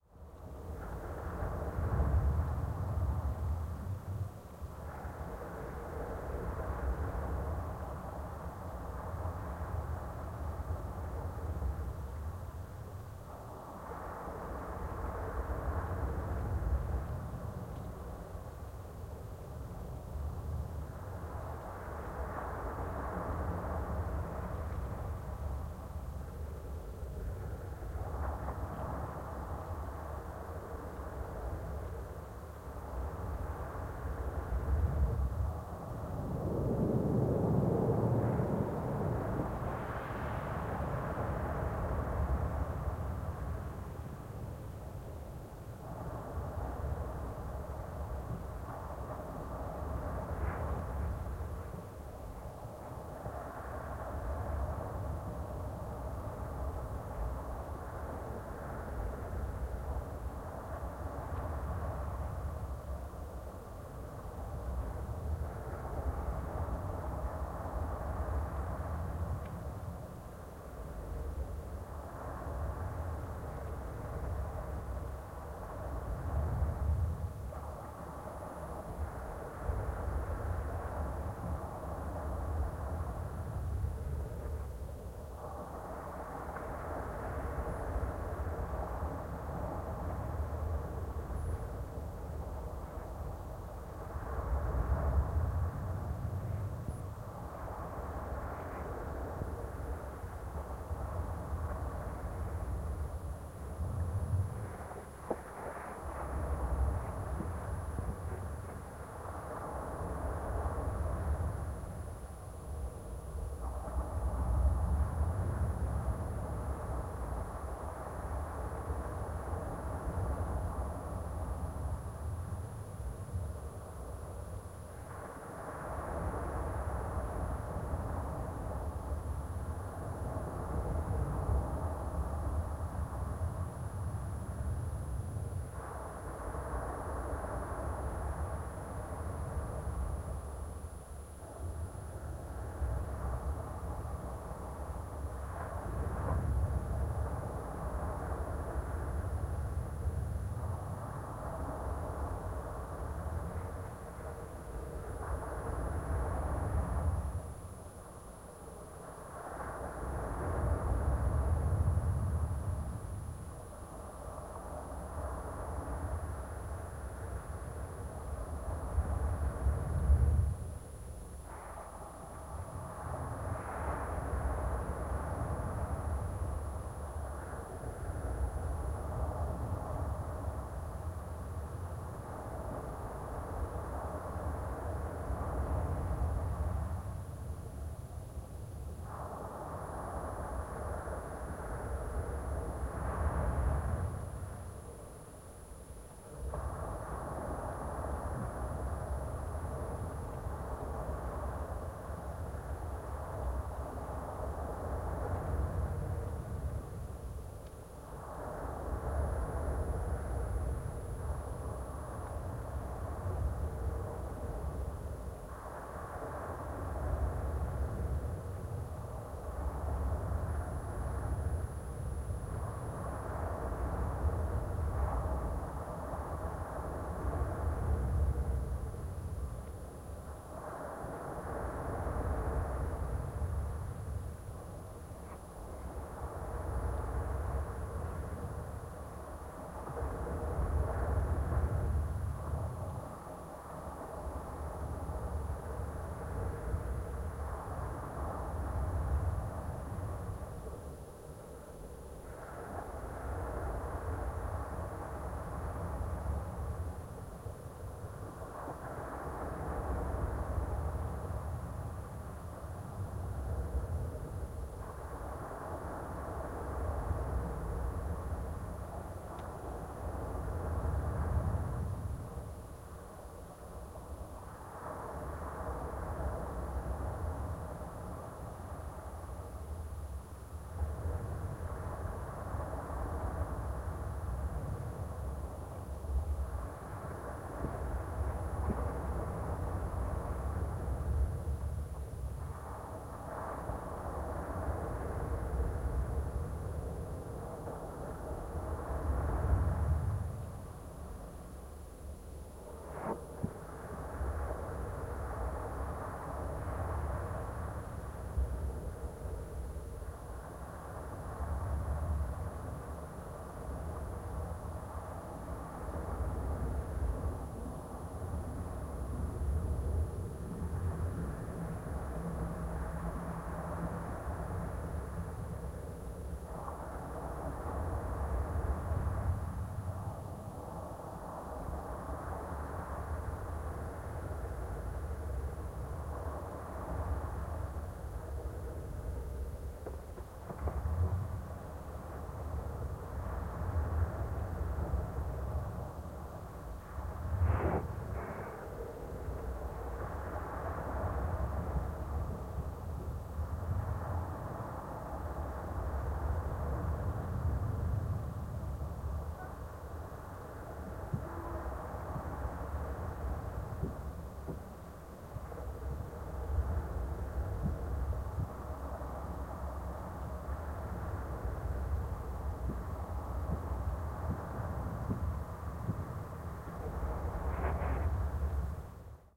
HYDRO-BURIED-SAND-SEA
A couple of hydrophones buried in the sand, one foot deep, in front of the mediterrean sea.
SD 702 + JRF D-Series Hydrophones
BURIED, HYDROPHONES, SAND, SUB, UNDER